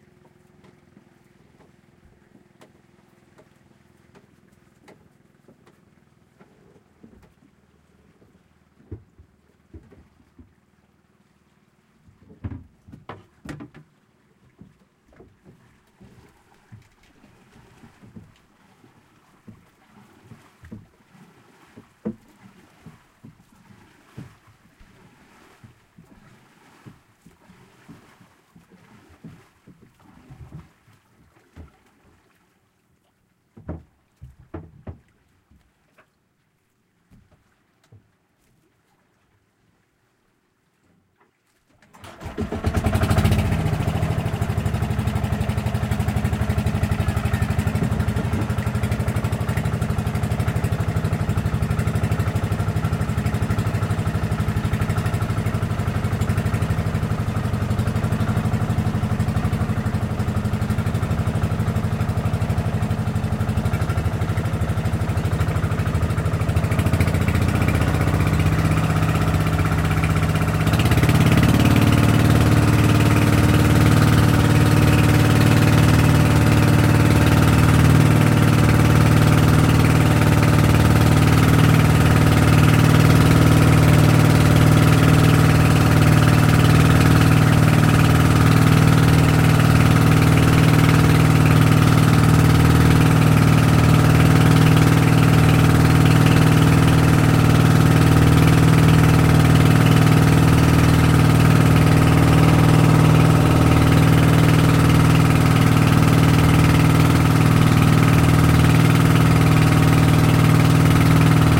Boat starting.
I recorded this audio file in a bangka (small motor boat)), near Tablas island (Romblon, Philippines)
When the recordings starts, the boat skipper rows to go away from the shore. Then, he starts the engine, and accelerates.
Recorded in November 2016, with an Olympus LS-3 (internal microphones, TRESMIC ON).
High pass filter 160Hz -6dB/oct applied in Audacity.